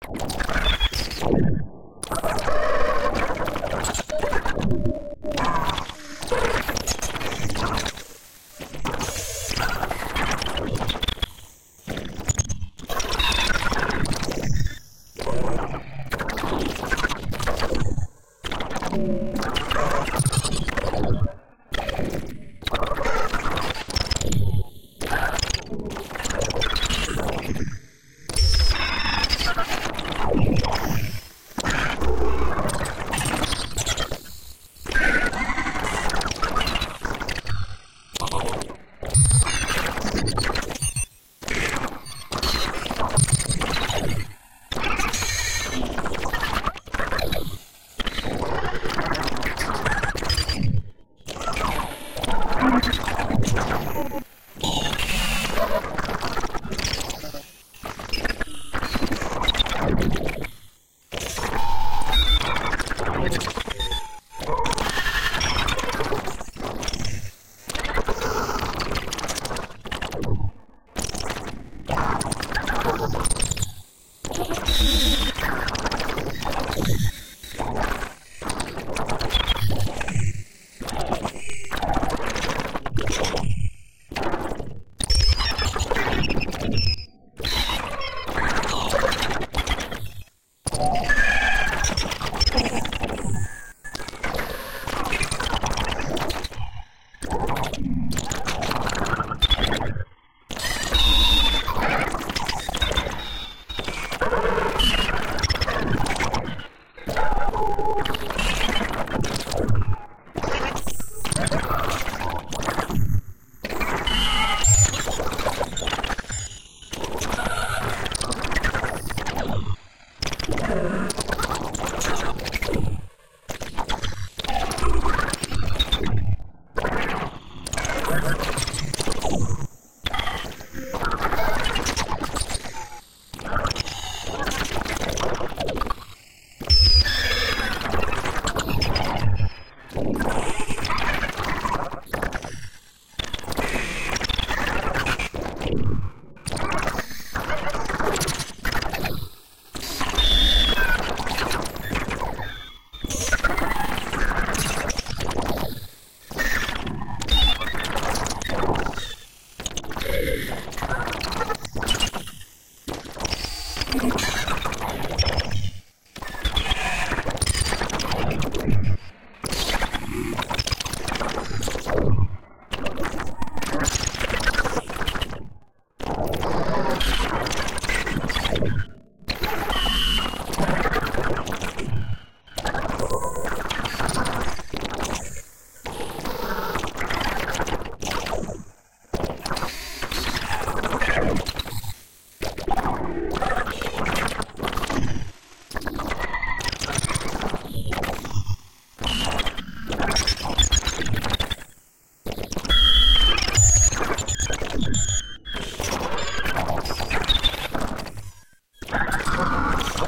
Created a module chain with the intention of generating something "inhuman". "weird sounds" initial render, "weirder sounds" adjusted with additive synth elements toned down, "weirdest sounds" I realized I could open a portal to hell by focusing on one modulation path after the previous adjustments.
shriek, sfx, modulation, alien, sound-effect, flutter, demonic, screech, hiss, groan, squeak, space, digital, beep, monster, evil, whisper, tech, sci-fi, laugh, noise, synth, grunt, additive, creak, animal, breath, squeal, chirp, murmur